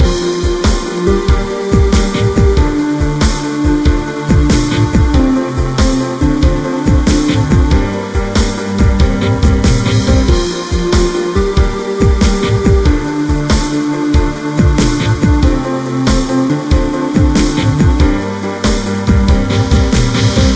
Loop Hard Working Alien 02
A music loop to be used in fast paced games with tons of action for creating an adrenaline rush and somewhat adaptive musical experience.
indiedev, music, loop, videogames, Video-Game, games, videogame, war, gamedeveloping, game, victory, music-loop, indiegamedev, gaming, battle, gamedev